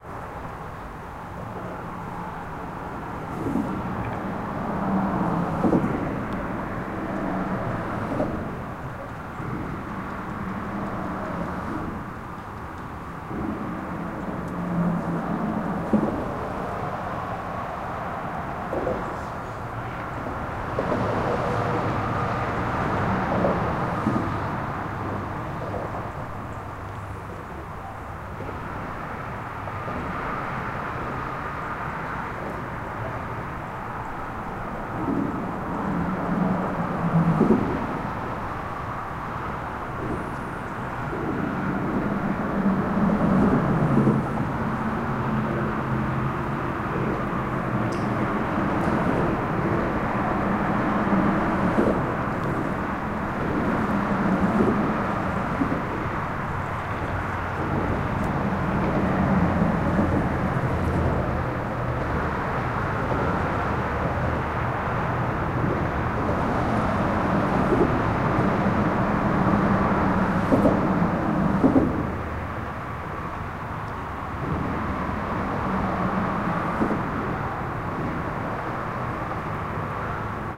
highway noise, underneath 183A tollway
Standing underneath Highway 183A, just a little way off the Brushy Creek Regional Trail near Twin Lakes Park. You can hear the thumps as the cars drive over the seams in the pavement of the bridge overhead.
Recorded with Olympus LS-10, using the built-in mics.
Edited and normalized in Audacity.
field-recording, road, cars, overpass, highway